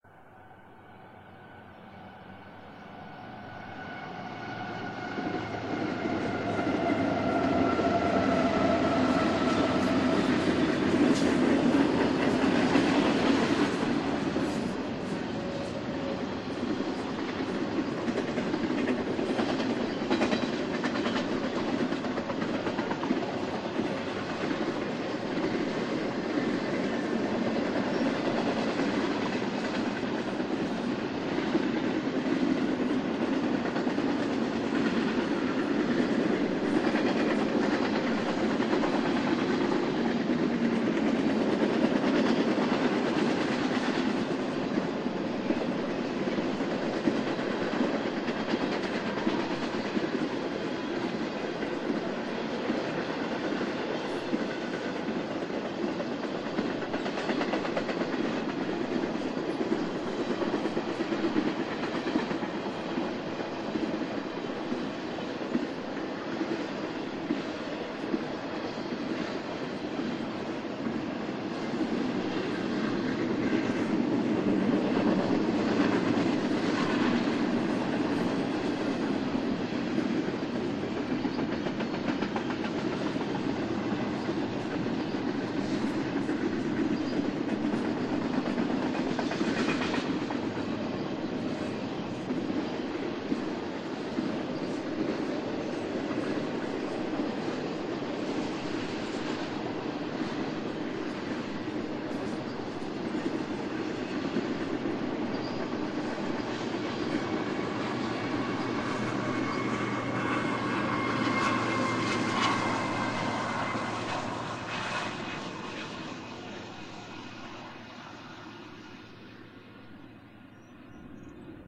Freight Train Passing By
This is about 2 minutes total. It is of an approaching long freight train passing about 100 yards away in it's entirety. Train was doing around 45 mph. There are NO other background sounds, no traffic, no crossing alarms, no street noise, no airplanes, no people, etc. There are three diesel electric locomotives in the front, then about a mile of freight cars, then one diesel electric locomotive "pushing" from the rear (it almost sounds like a jet engine!). Recorded alongside the tracks in open west Texas desert! Recorded using a Panasonic DVX200 with a Rode shotgun mic & deadcat. Audio extracted from the video file.
locomotive, rail, passenger-train, railway, railroad, train